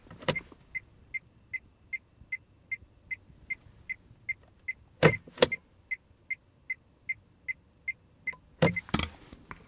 car alarm lights switched on beeps 2
I switched on the car alarm lights. A beeping sound can be heard. Another one.
Recorded with Edirol R-1 & Sennheiser ME66.
alarm, alarmlights, alert, beeping, beeps, blinking, car, direction, light, lights